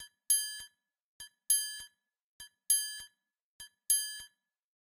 triangle-100-bpm-003
100-bpm, triangle